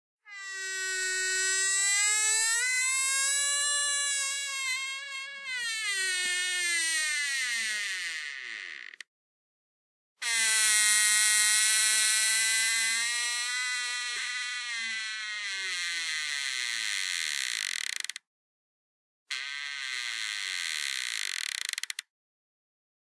Door creaking, manufactured home. Recorded on iPhone 4s, processed in Reaper.
squeak, creak, door